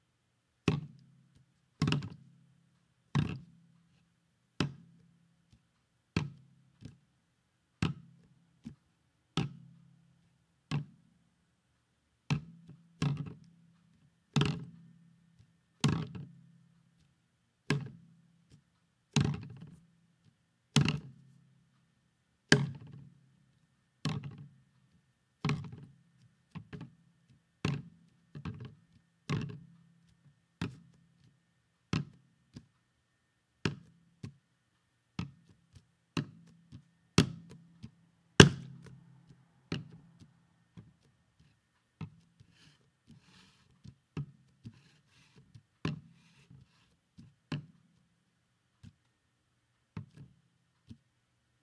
Picking up and setting down a plastic bottle on a wood surface. More diverse handling sounds towards the end of the sample.